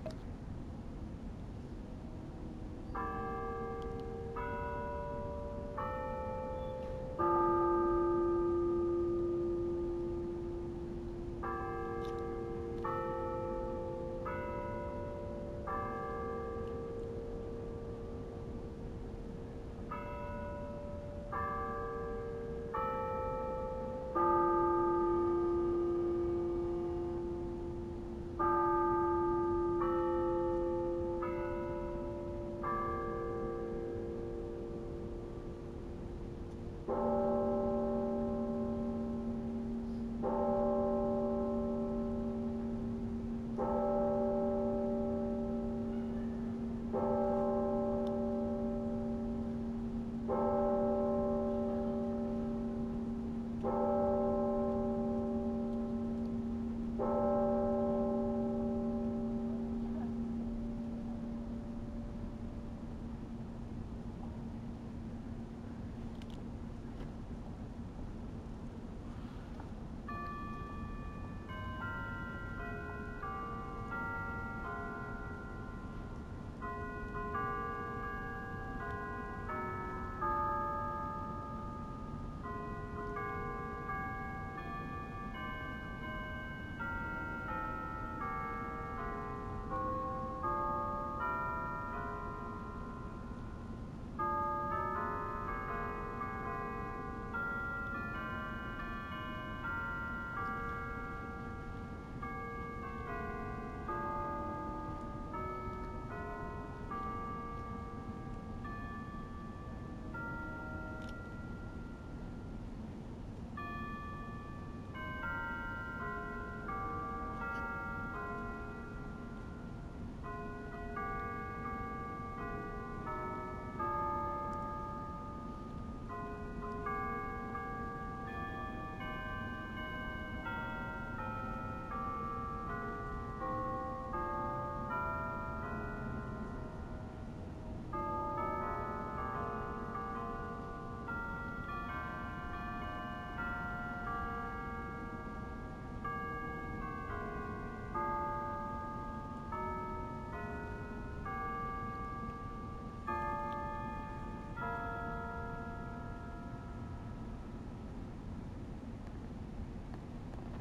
Seven O'Clock
This is the clock tower at the University of North Texas striking seven and playing the North Texas alma mater.
alma-mater, bells, chimes, clock-tower, UNT